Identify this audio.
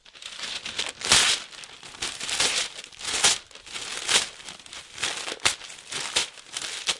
This sound is tearing newspaper
newspaper, paper